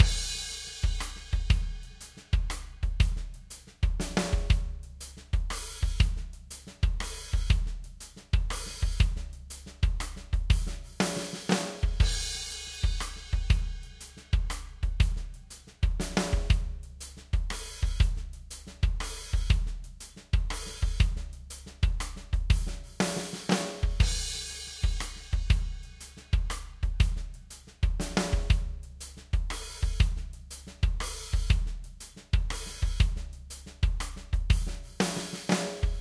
Song5 DRUMS Fa 3:4 120bpms
HearHear, rythm, 120, beat, Fa, bpm